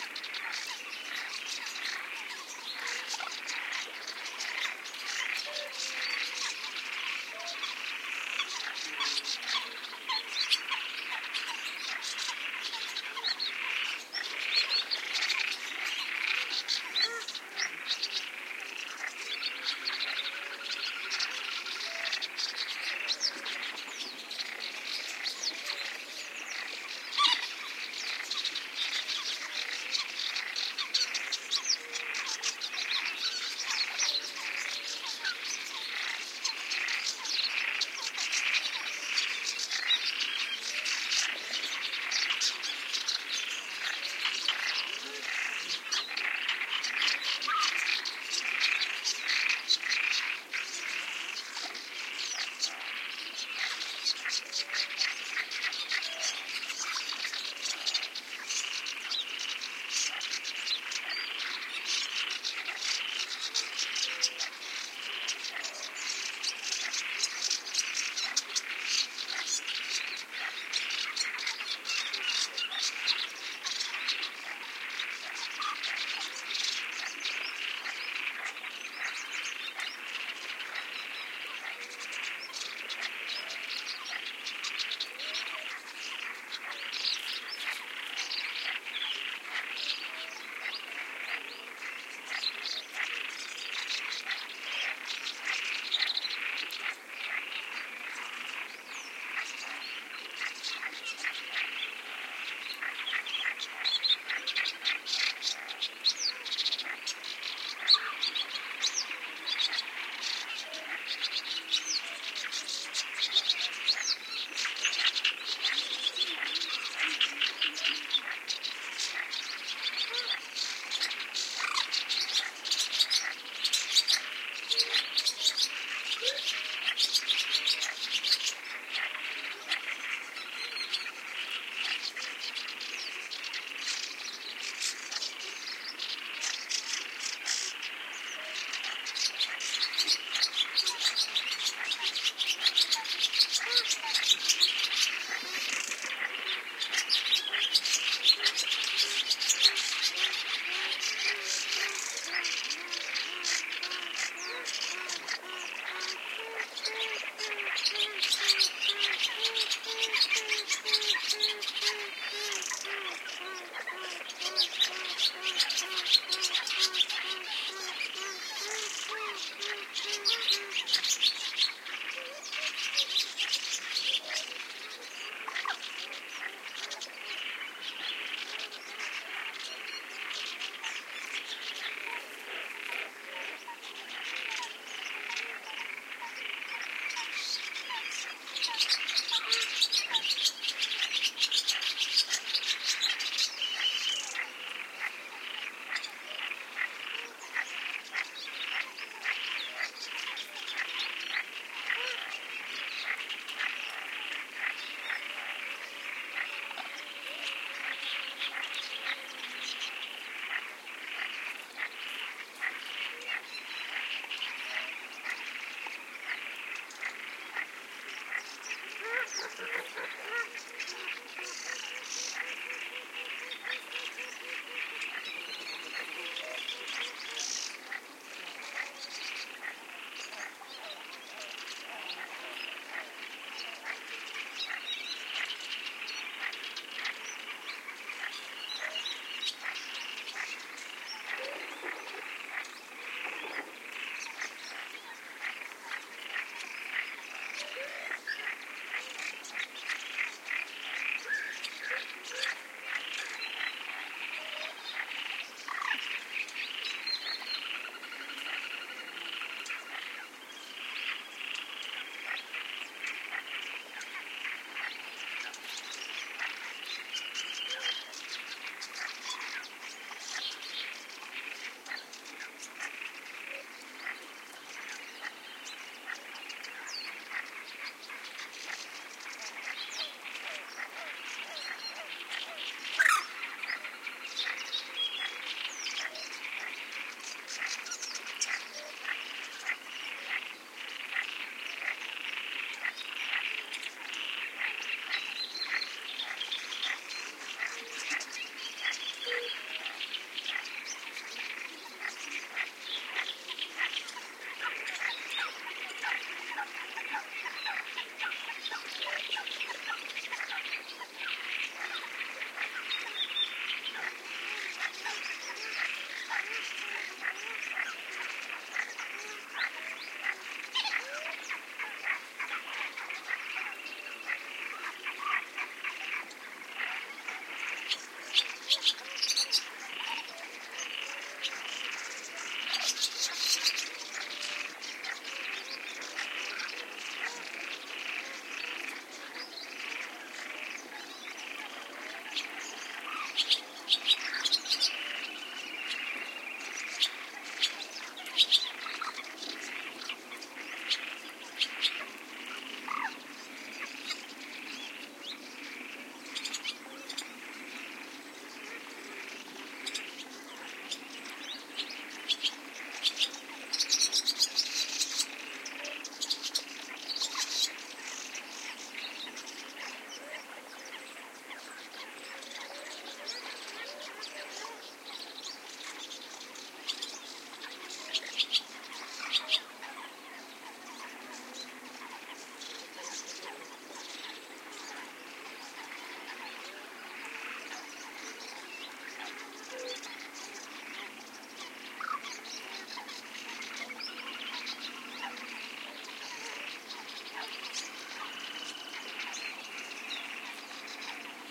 20110320 spring.marsh.atmosphere.02
close marsh ambiance with frog and varied bird calls (Little Grebe, Swallow, Coot, Crested Lark and more. Recorded at the Donana marshes, S Spain. Shure WL183, Fel BMA2 preamp, PCM M10 recorder